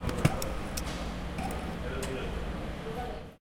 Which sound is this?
Sound of a payment machine of a big car park reading a card.